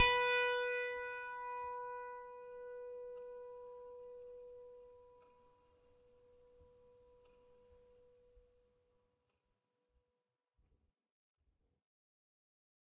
a multisample pack of piano strings played with a finger
fingered, piano, strings, multi